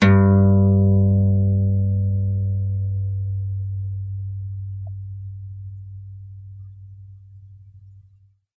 Single note played on an acoustic guitar from bottom E to the next octave E